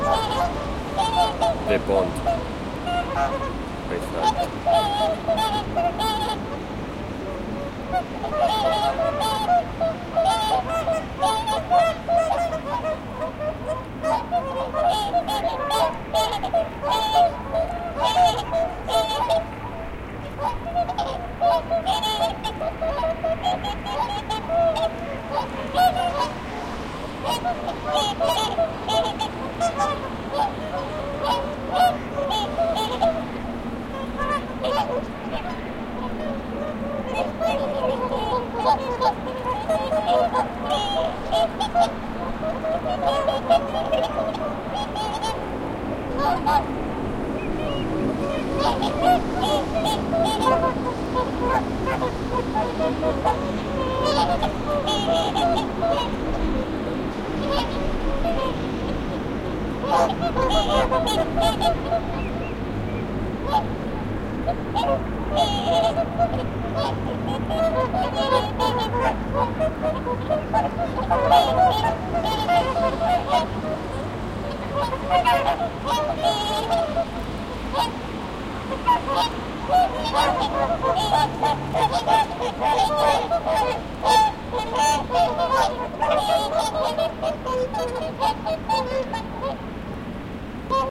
Pond full of swans
Recorded in a pond in Iceland
ducks, iceland, lake, nature, pond, swans, water